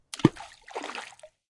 A fist-sized rock is thrown into an estuary. Recording chain: Rode NT4 (stereo mic) - Sound Devices MixPre (mic preamp) - Edirol R09 (digital recorder).